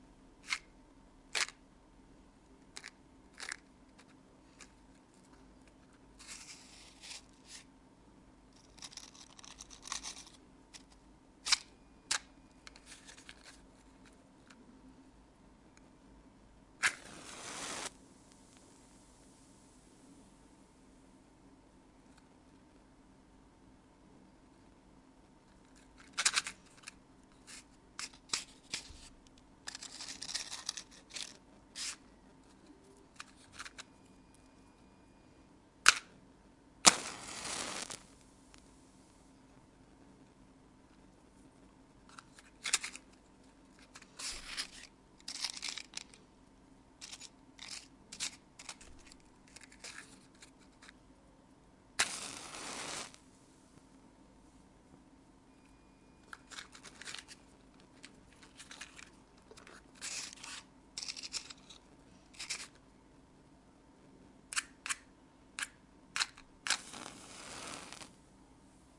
Stricking a Match
In this audio I recorded my handling of a match box and my various attempts of lighting a match.
burn, crackle, fire, flame, light, match, matchbox, OWL, strike, striking